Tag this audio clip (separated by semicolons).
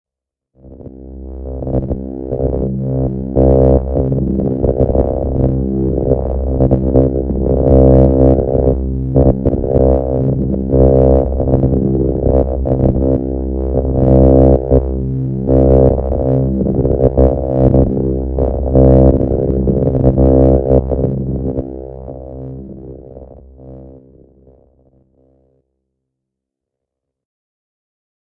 ambient; Analog; drone; evolving; Mopho; soundscape